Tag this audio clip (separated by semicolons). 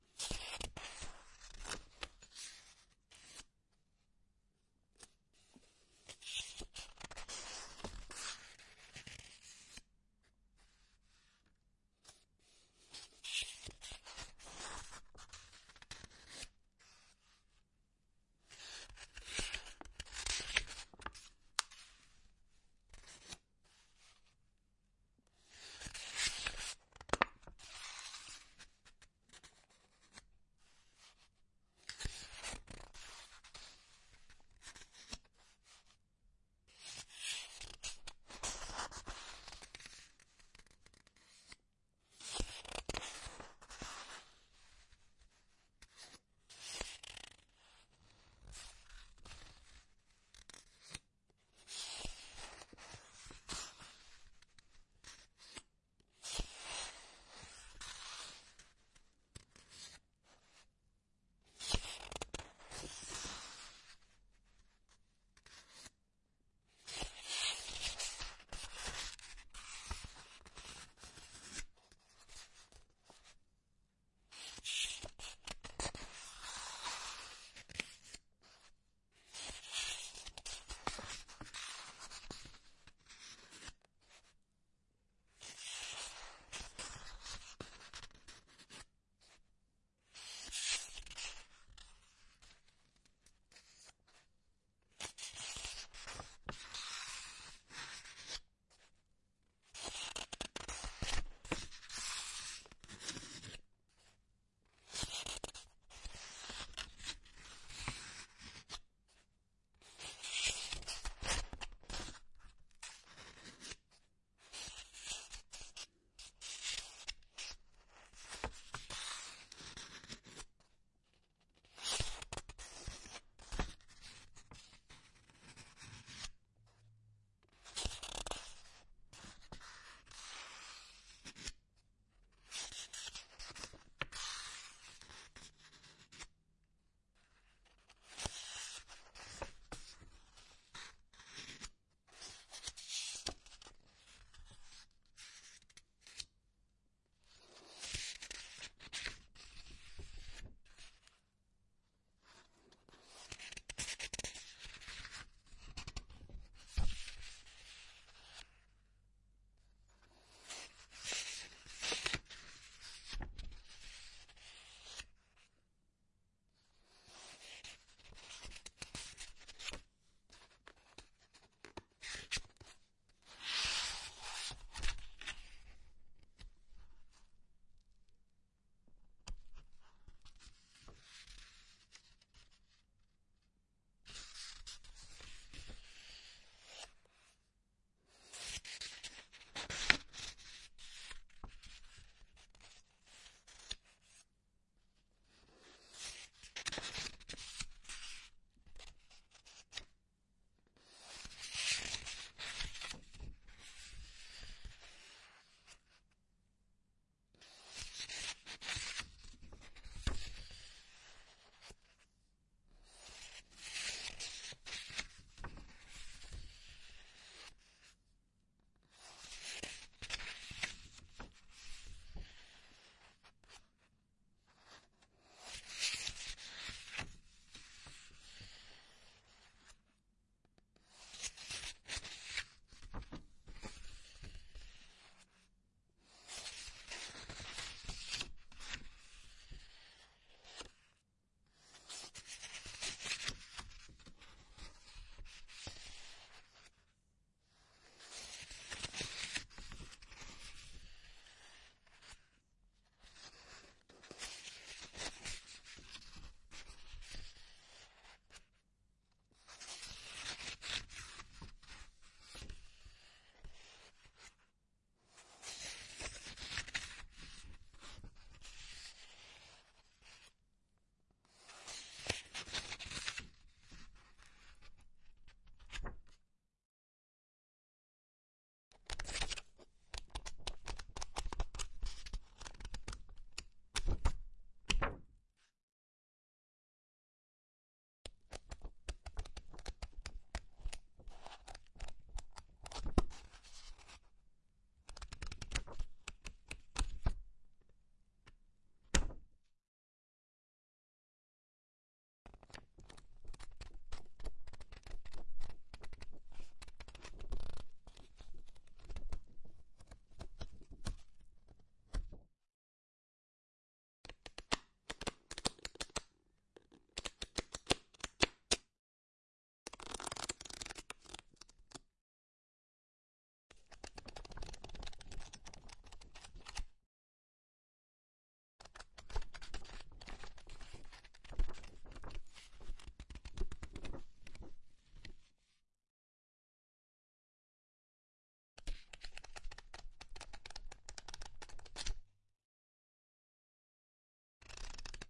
books; turning-pages; turn; turning; flip; page; flick; magazine; ASMR; pages; reading; newspaper; book; read; paper; flipping